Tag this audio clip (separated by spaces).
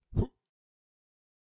jump jumping fx